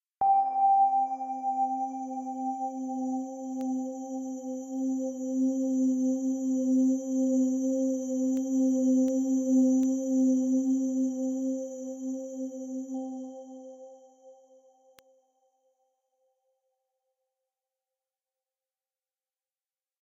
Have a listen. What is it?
A very soft bell chime that fades out. Sounds very calm, could be used as a smooth notification. Recorded with Ableton Live.
bell, bleep, calm, ding, evolving